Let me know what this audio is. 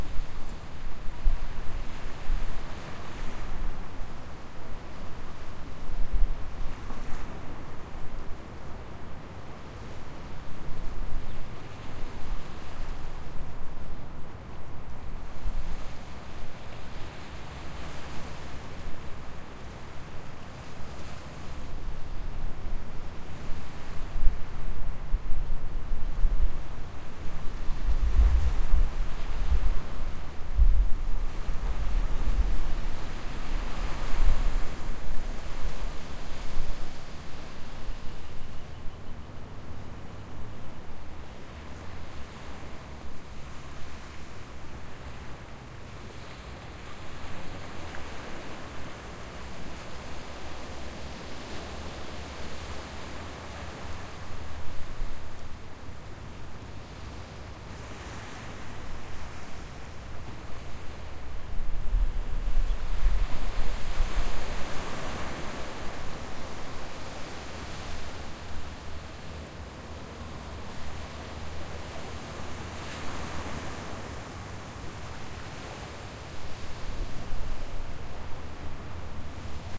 Quiet shore in summer
Recording of a coast shore in summer, you can hear birds and insects.
Recorded with a Tascam DR-40 in A-B mode.